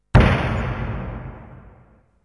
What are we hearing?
industrial tom10
tom, industrial